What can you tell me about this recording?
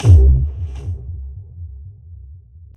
This long bassy Doucekick was created for future beats.